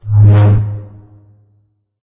single light saber swing.
Made using mic feedback.